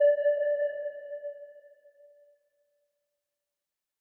archi sonar 04
I created these pings to sound like a submarine's sonar using Surge (synthesizer) and RaySpace (reverb)